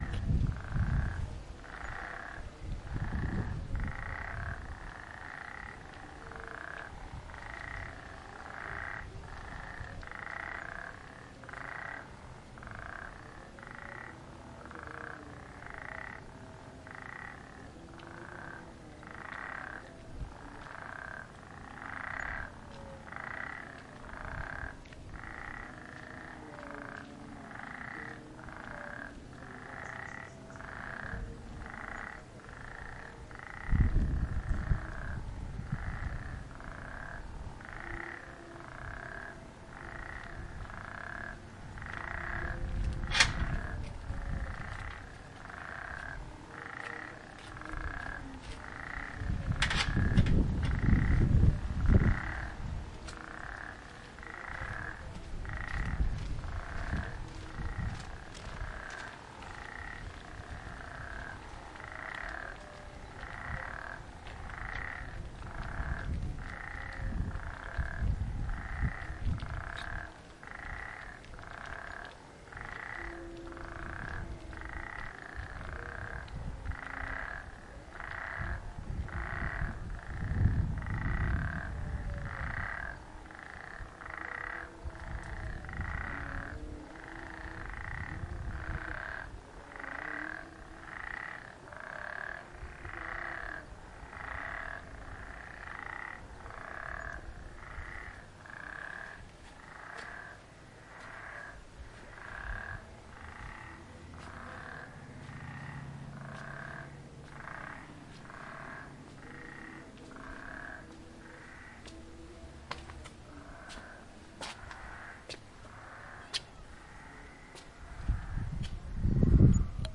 elephantine island frogs
Frogs on the Nile River at Baba Dool (a Nubian house converted Airbnb), which is on an island in the middle of the Nile called Elephantine Island.